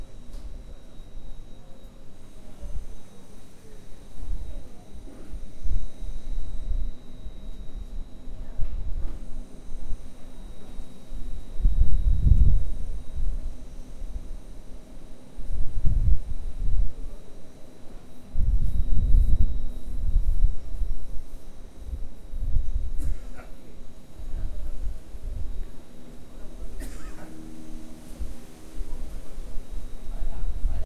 Field recording a of a train station in buenos Aires argentina.